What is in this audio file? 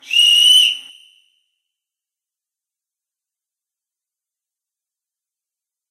Same sound as connersaw8's "Coach Whistle," but the factory noise has been removed from the background. Additionally, the amplitude has been dropped a bit with a touch of reverb thrown in to round out the ending.